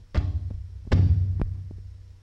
I recorded this sound back in 2002. Hitting something metalish.
hit, metal, soft